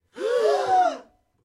breath group shocked5
a group of people breathing in rapidly, shock-reaction
air, breath, shock, shocked, suspense, tension